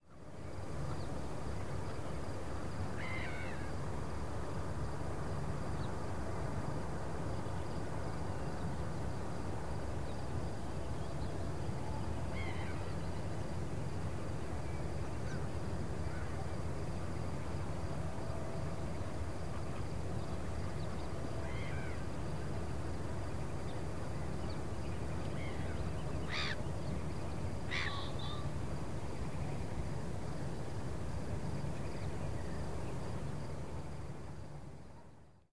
atmosphere-evening-birds
Ambience of field of grass. Evening, sunset. Sounds of birds wind, and hum of road. Stereo recorded on internal Zoom H4n microphones. No post processing.
ambience, evening, field-recording, hum